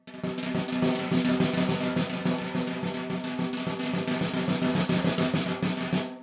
Snaresd, Snares, Mix (17)
Snare roll, completely unprocessed. Recorded with one dynamic mike over the snare, using 5A sticks.